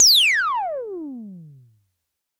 EH CRASH DRUM11
electro harmonix crash drum
crash, drum, electro, harmonix